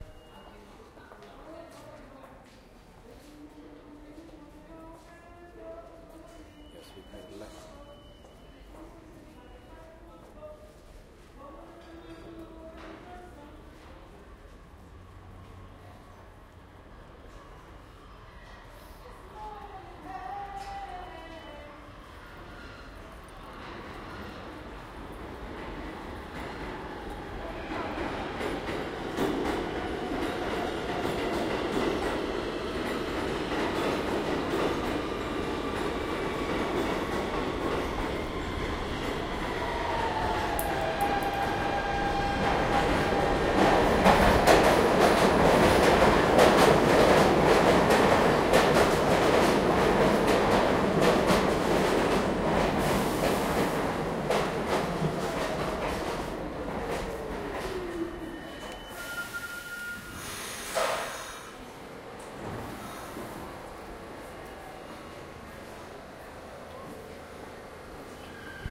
NYC ambient subway station and train approach
A short recording from a station of the NYC subway including a singer or busker. The train arrives and the doors open. Zoom H1
train, NYC, field-recording, busker, metro, transport